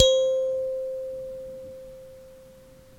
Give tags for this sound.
kalimba; single; note; africa; singlenote

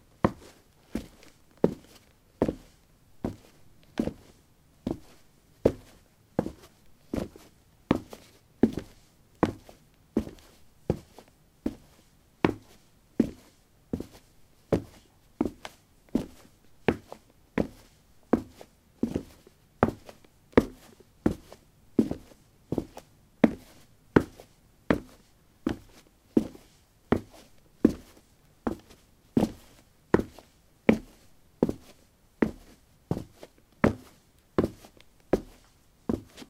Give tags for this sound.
footstep; footsteps; step; steps; walk; walking

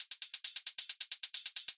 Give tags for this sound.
hi; loop

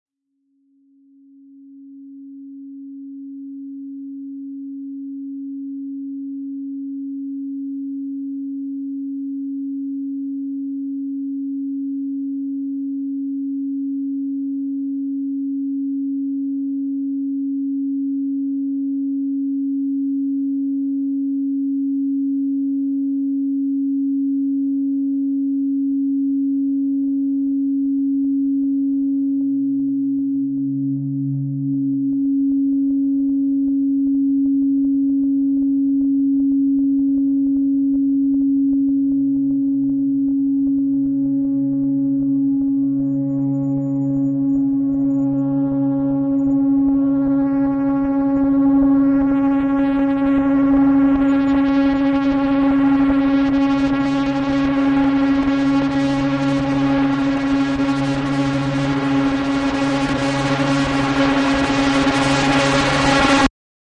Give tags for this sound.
crescendo; drone; environmental

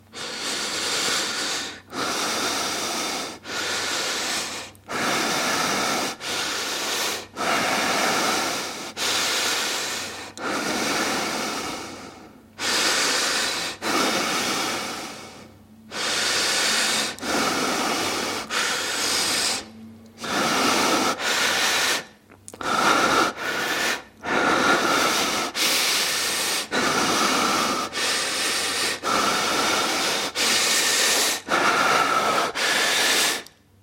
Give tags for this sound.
inspiration; body; fear; anxiety; air; panic

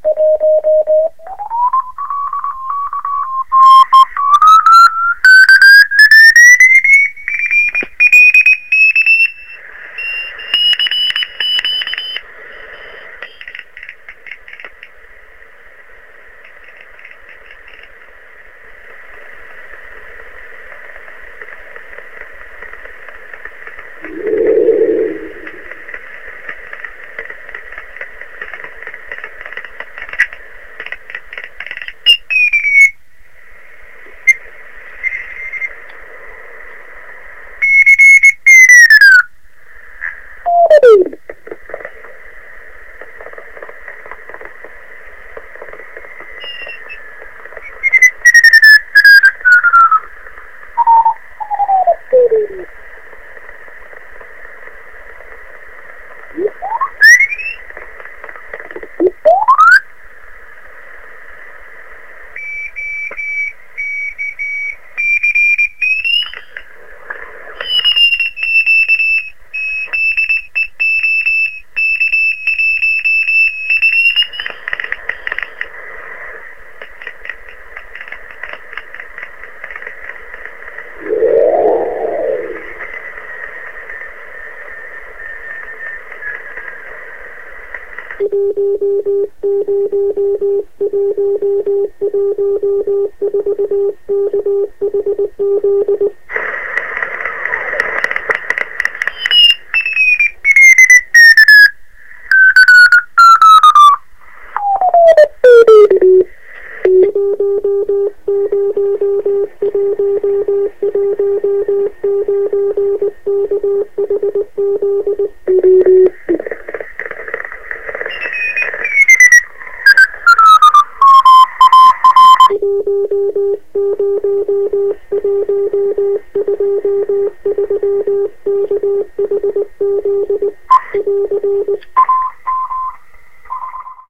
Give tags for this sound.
morse; clicks; amateur; radio; cw

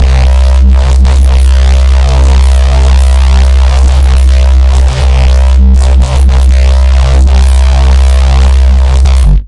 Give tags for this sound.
heavy; driven; reece; drum-n-bass; bass; harsh

